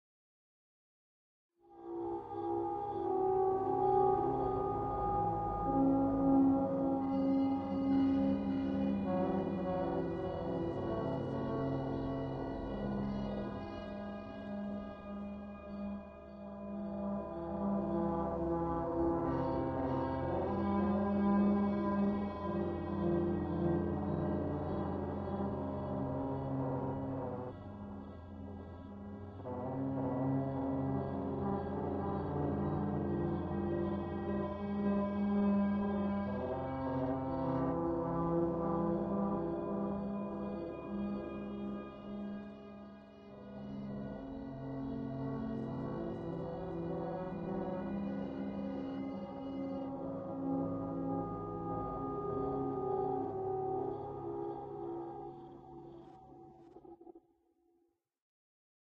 Ds.ItaloW.TrumpeterGirl.1
late hours…friends cheering… The artist improvising within miles ambience.